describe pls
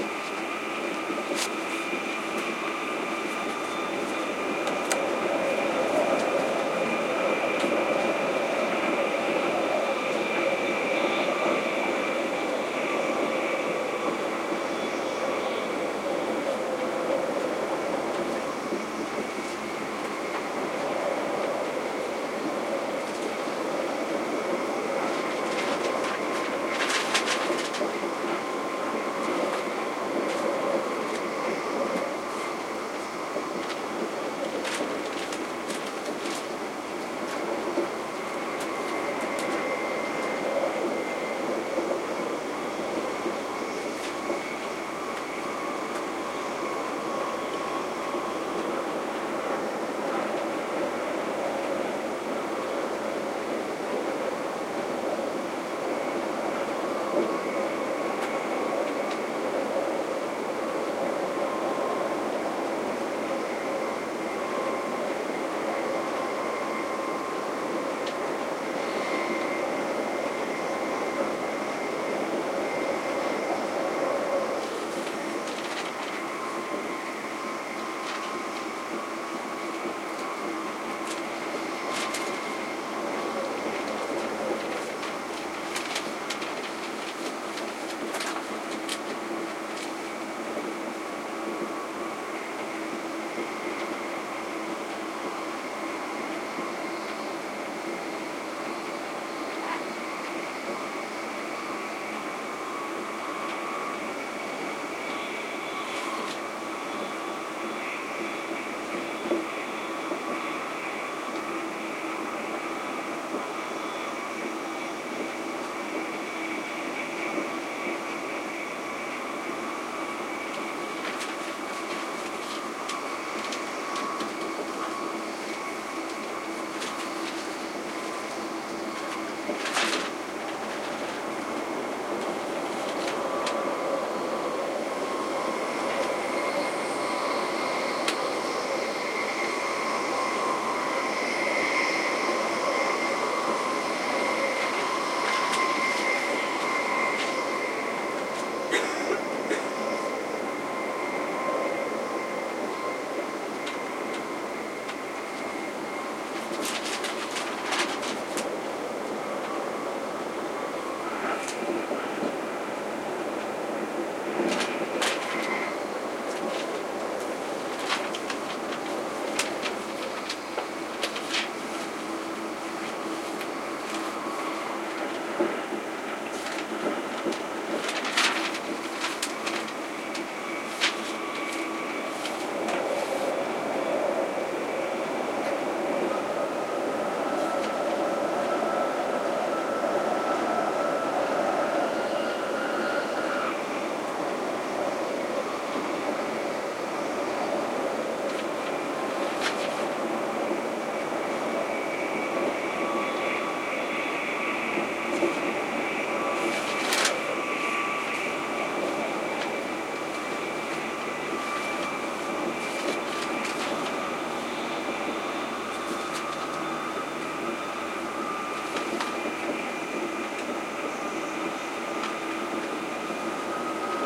amb train in windy day
inside ambient sound in a train on a windy day.
recorded on tascam dr-08.